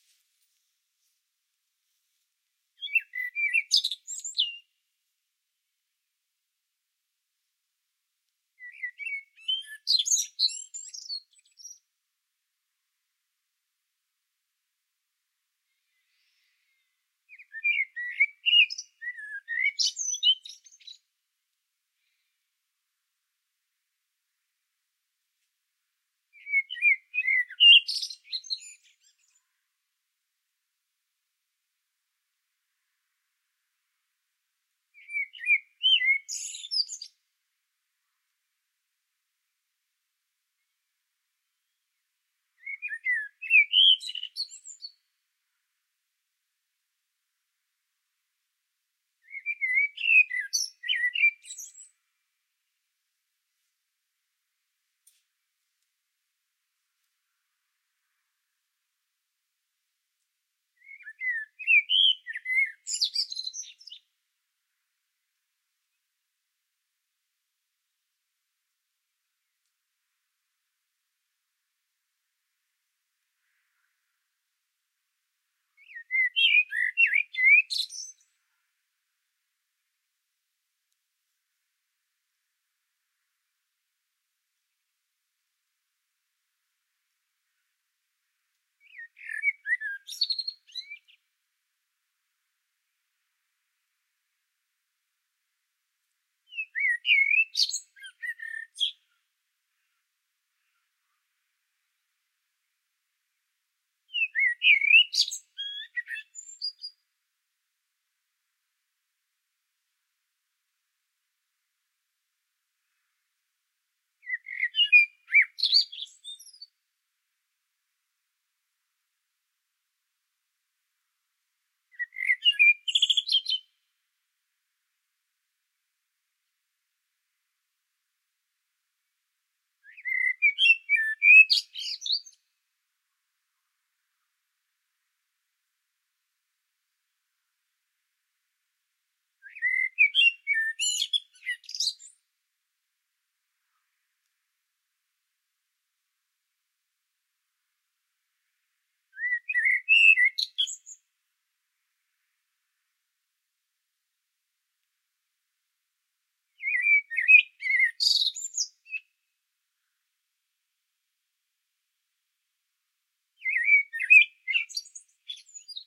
I heard a blackbird in our garden.
I sneaked in to get the sound recorder and microphone and
tried to get me close to the bird without scaring it off.
And here is the recording.
Eqipments used
Microphone 1 Sennheiser shotgun MKE 600 Rycote Classic-softie windscreen
birdsong, soundscape, chirp, birds, tweet, bushen, zoom, nature, blackbird, bird, field-recording, spring, chirps, garden, summar, whistling, birdfeed, bird-song, whistle